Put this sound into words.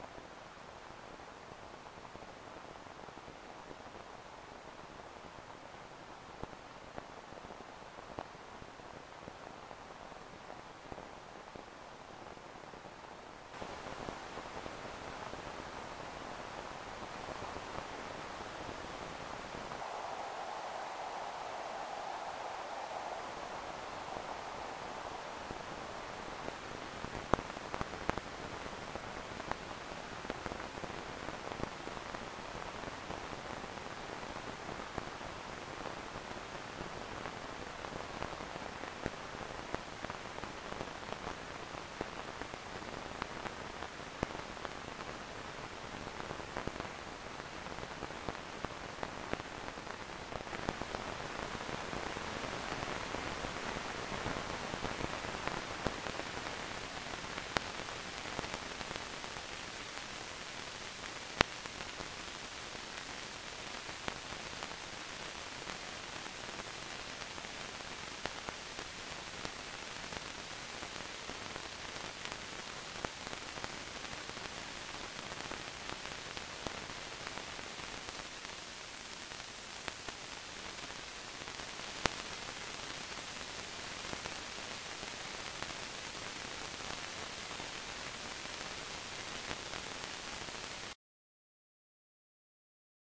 buffer static = made with a max/msp patch. it is static.